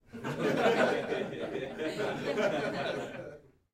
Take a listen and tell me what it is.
Recorded inside with about 15 people.